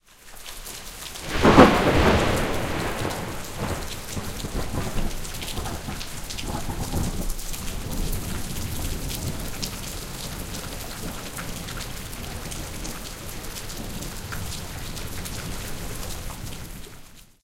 rain and thunderclap.
recording Turkey/Istanbul/Fındıkzade 2008